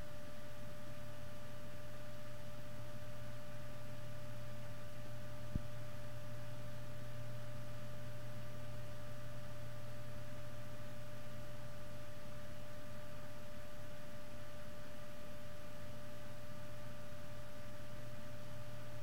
Quiet Computer Fan
A computer fan's quiet ambient noise.
Recorded with: Shure SM57 Dynamic Microphone.
This sound loops very nicely.
fan, computer, quiet, ambiance